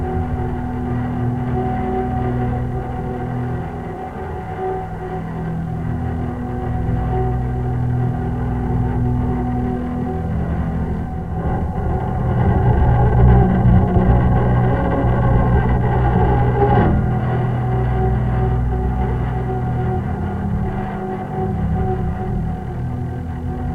Ambience Sinister Electric Cello Loop 06
An electric cello ambience sound to be used in sci-fi games, or similar futuristic sounding games. Useful for establishing a mystical musical background atmosphere for building up suspense while the main character is exploring dangerous territory.
ambience, ambient, atmosphere, cello, cinematic, dark, drone, electric, electronic, futuristic, game, gamedev, gamedeveloping, games, gaming, indiedev, indiegamedev, loop, sci-fi, sfx, soundscape, video-game, videogames